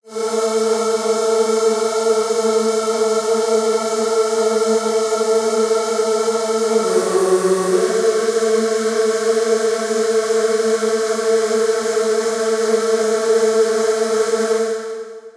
shot sound 3-Massive
experimental techno sounds,production
experimental, techno, produccion, loop, sintetizador